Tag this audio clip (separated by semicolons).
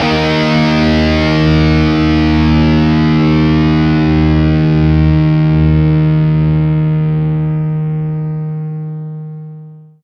Distortion; Electric-Guitar; Melodic